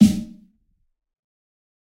fat snare of god 019
This is a realistic snare I've made mixing various sounds. This time it sounds fatter
snare, god, realistic, kit, fat, drum